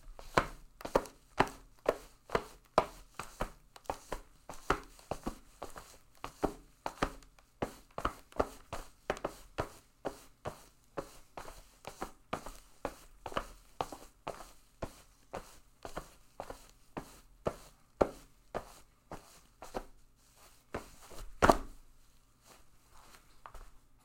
Just some random footsteps on a tile floor stomping around.